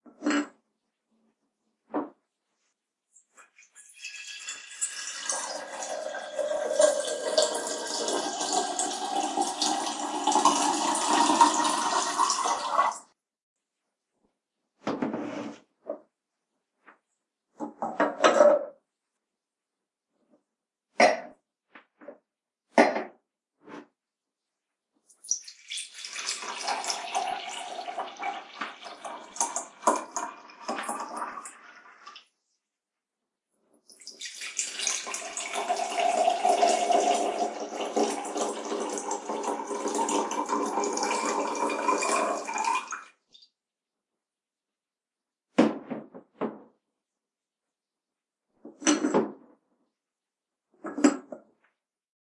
Filling Hot Water into a Ceramic Can and 2 Cups
a, boiled, can, ceramic, cups, filling, hot, into, splashing, two, water